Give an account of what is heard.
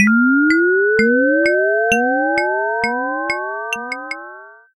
BRASSEUR Justine 2020 2021 ET
For this sound, I added a 2000Hz sinusoidal tone which I reduced the speed. I added a resonance sound that I repeated 3 times and increased the speed of the last one with a sliding stretch effect. I also added a chirping sound with an echo in the back.
supernatural, magical, mystical